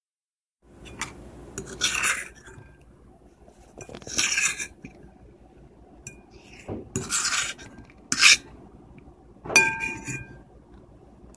scraping-fork
A thin metal fork scraping a ceramic plate plus the fork hitting the side of the plate.
ceramic, clinking-silverware, fork, metal, scrapping